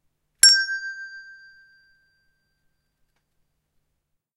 A single hand bell strike of the note G. Browse through the pack for other notes.
An example of how you might credit is by putting this in the description/credits:
The sound was recorded using a "H1 Zoom V2 recorder" on 15th March 2016.